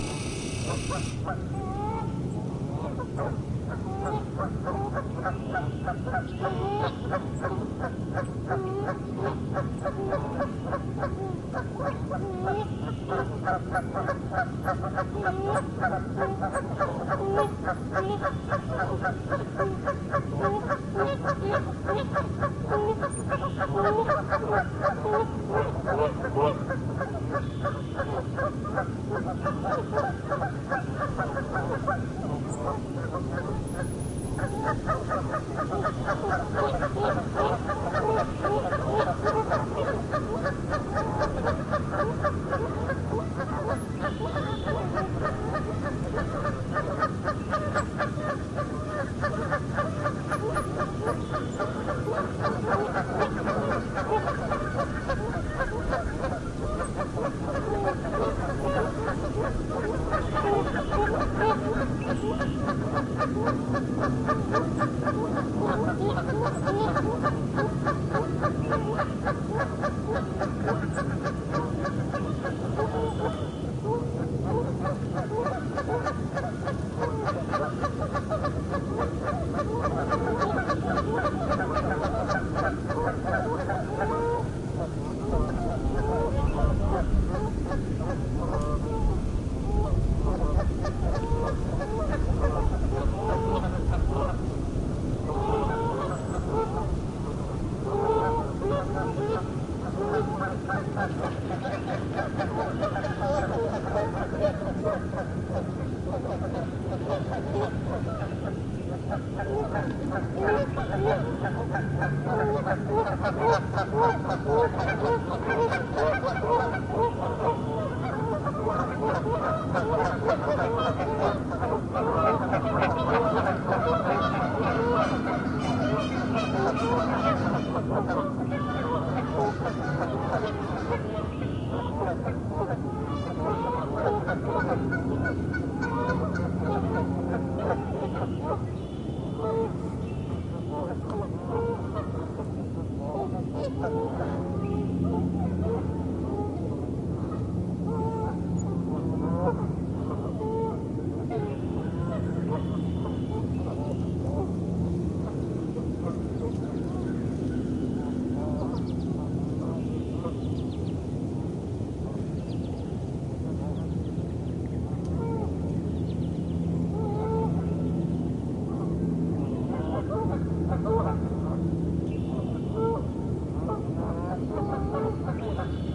Ben Shewmaker - Griffey Park Geese
Recorded at my local park, this features noisy geese who like the large lake.
field-recording, geese, animals, birds